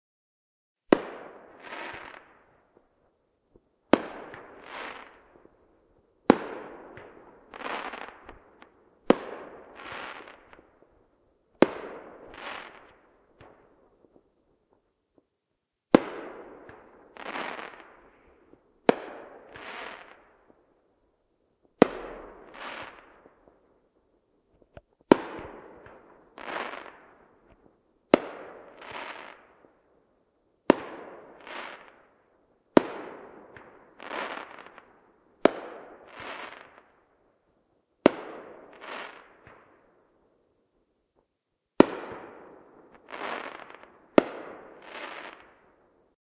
Fire Cracker Show part1
Part of a 4th of July fire cracker show.
4, boom, cracker, day, fire, independance, july, pop, rocket, show